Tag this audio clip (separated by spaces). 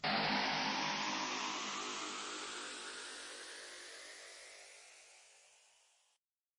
android automation computer droid electronic machine mechanical robot robotic space